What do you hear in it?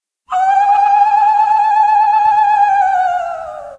A stereo recording of an African lady ululating offstage at a concert in a small venue in the UK. Zoom H2 front on-board mics.
african, ululating, african-lady, ululation